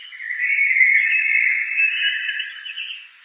These are mostly blackbirds, recorded in the backyard of my house. EQed, Denoised and Amplified.